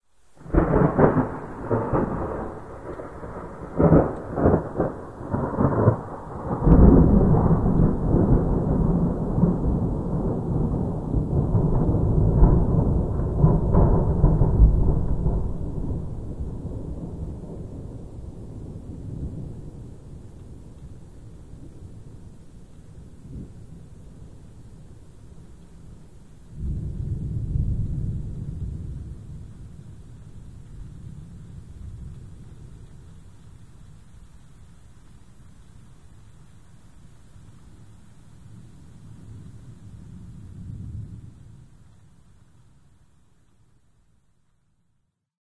2008APRILIS21DORGES1
My best thunder recording of this stormy day. Recorded by MP3 player.
field-recording, lightning, storm, thunderstorm, weather, severe, thunder